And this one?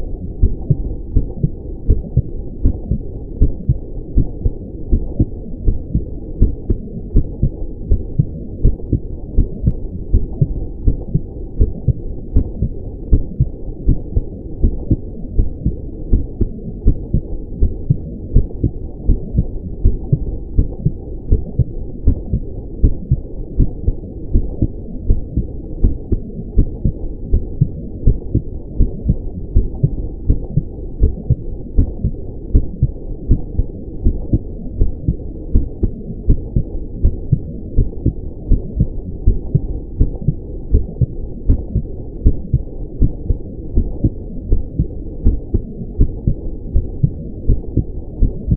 Heartbeat Mono 80 BPM
80bpm, heart, heartbeat, human, mono, processed, stethoscope